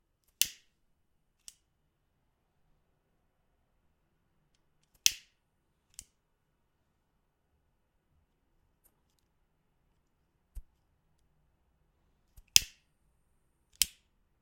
Clicks of the cigarette lighter. Also quiet sound of gas without ignition.
click, noise